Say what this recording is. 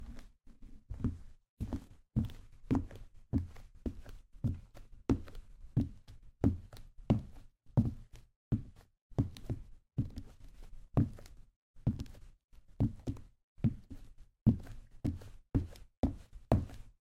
Walking Wood
Heavy boots walking on hardwood floors